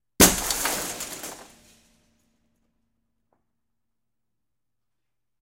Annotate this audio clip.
Windows being broken with various objects. Also includes scratching.
breaking-glass, window